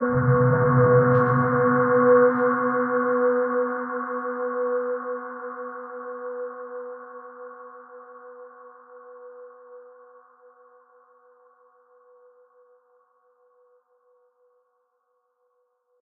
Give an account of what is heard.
evil space
Crated with some plugins. Tone C3